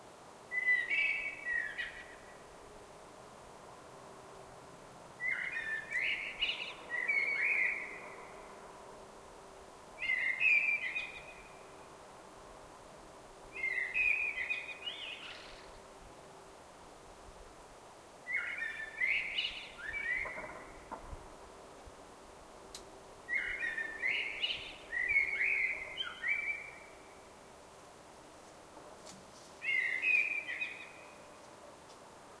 Blackbird Sweden short
A blackbird singing at night. Short version. Recorded in Sweden during springtime.
bird, song, koltrast